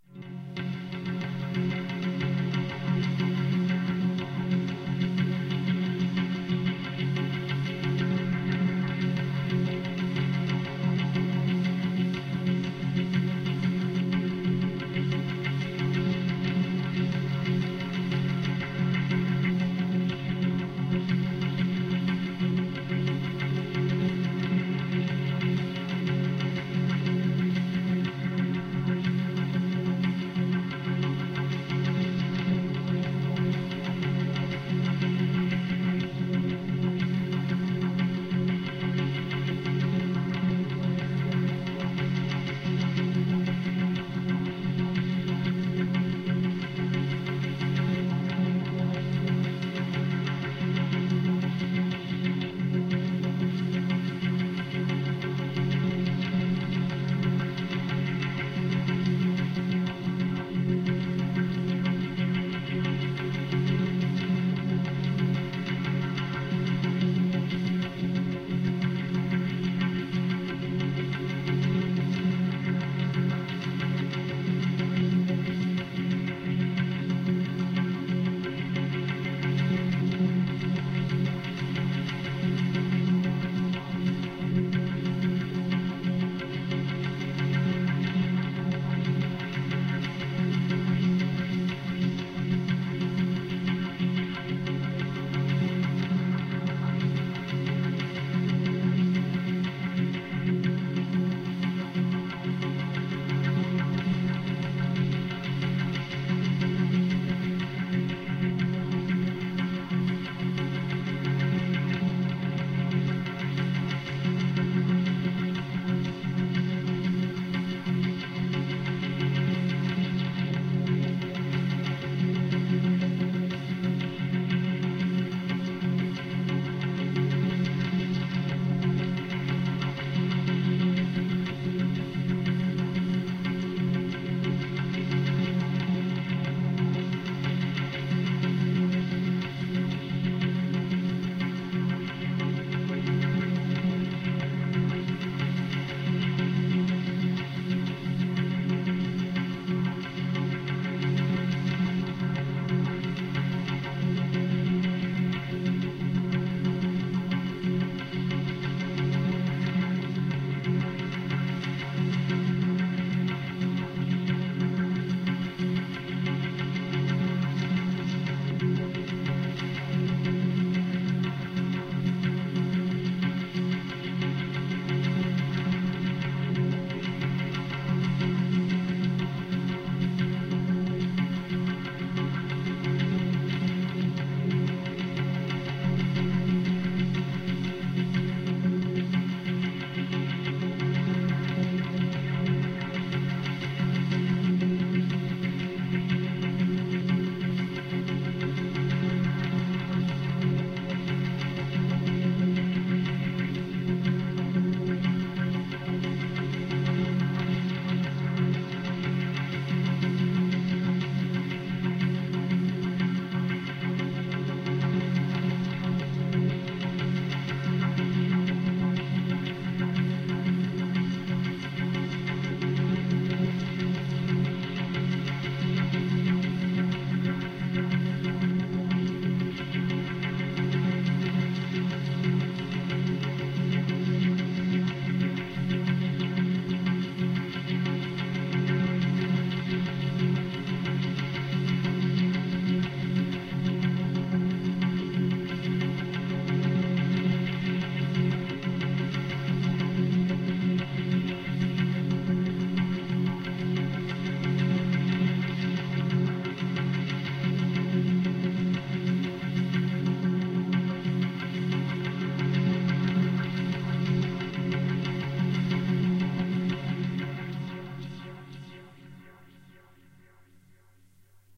tapehead dulcimer LONG LOOP
Here's another Loop created with my experimental one string Dulcimer using an old casstte player head as a pickup. This one's a long ambient loop that can be used as a backing track or chopped up. The sound was created using numerous vst effects in reaper. It's always great to hear what other people create too :0)
ambient, dulcimer, loop